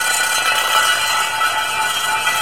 This sound are taken at Hahn, Germany in may 2013. All the sound were recorded with a zoom Q3. We have beat, scrap and throw everything we have find inside this big hangars.